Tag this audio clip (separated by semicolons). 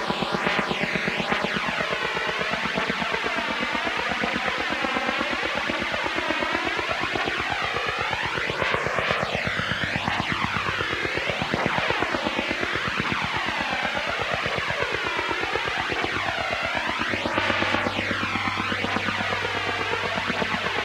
dance; effect; filtered; glitch-hop; low; noise; white